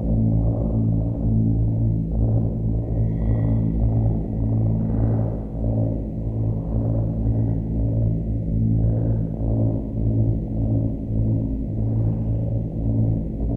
kerri-cat1o-lrdelay-loopable
This is fully loopable version of it (no fade in/out needed). Additionally - it was remixed with the same sound (slightly shifted, to make only 1 cat instead of 2), with swapped channels, to make the sound more centered/balanced. The sound is 1 octave higher than the original.